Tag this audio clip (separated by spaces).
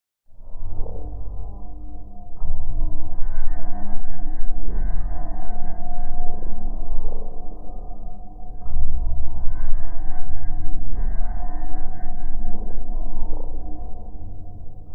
ambient deep horror surrealistic